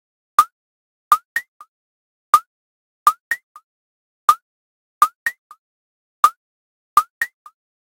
synthesised hi-pitched percussion basic loop
percussion, synthetic